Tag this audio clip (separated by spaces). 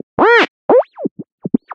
Beam FX Processed Laser Dance Sample Trance Psytrance